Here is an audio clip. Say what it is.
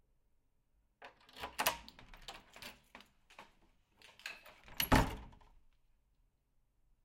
Wooden Door opening and closing with key
A wooden door with a lock opening and closing, with